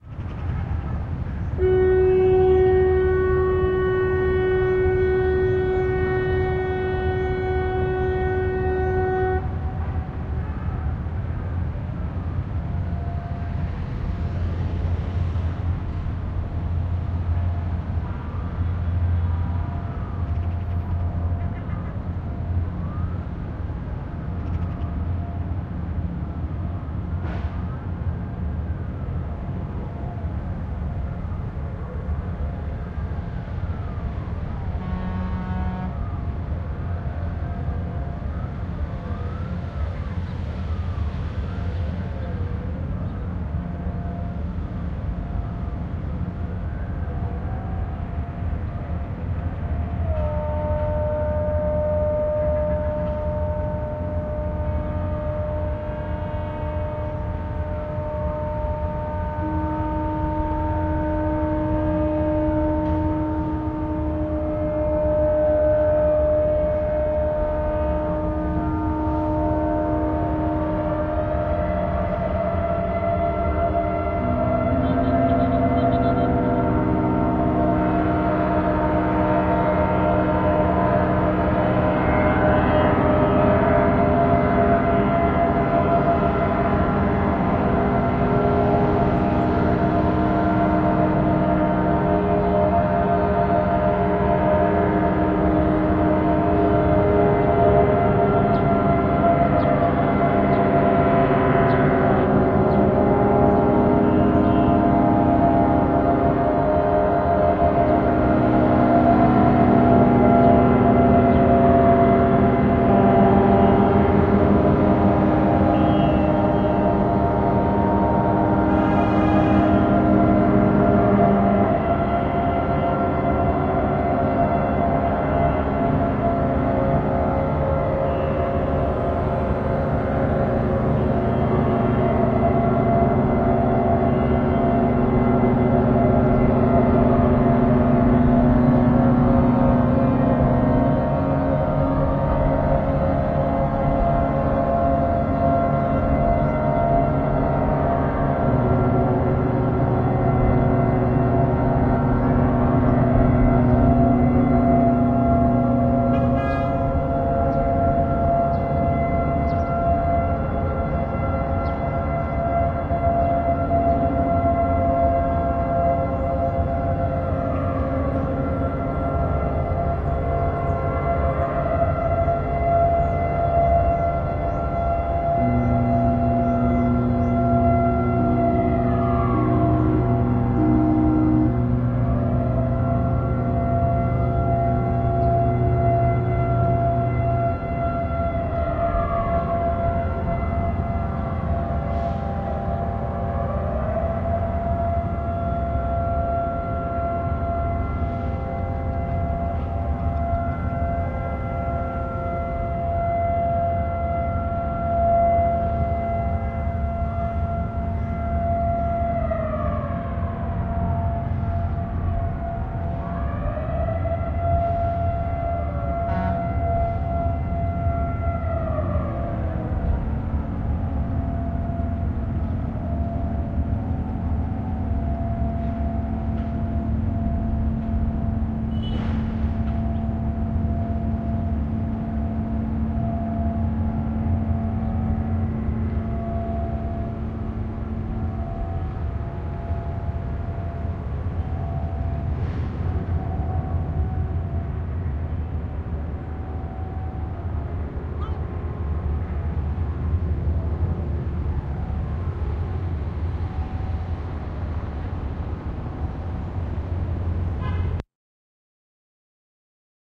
10Nov-Shiphorns-distant
This is recorded from an installation on the maiden's tower in the Bosphorus strait in Istanbul, Turkey. It has the sounds of a ship's horn and the background ambient noise.
ship, istanbul, horn, turkey, maidens-tower, bosphorus, geo-ip